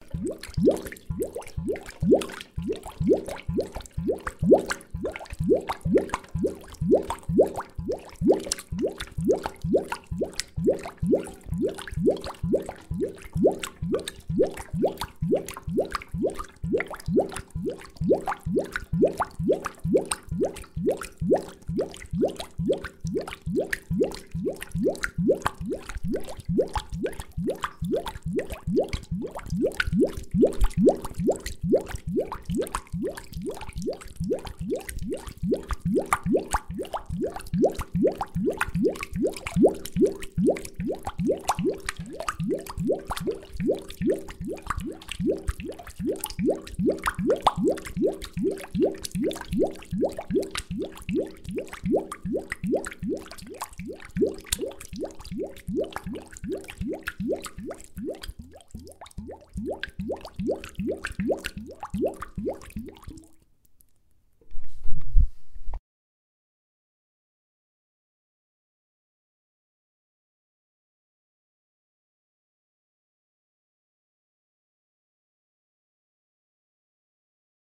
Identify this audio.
Bubbling water
Water Bubbles Bottle Plastic
Water, Bottle, Bubbles, Plastic